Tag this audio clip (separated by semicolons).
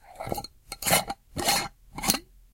bottle; cap; drink; lid; open; opening; soda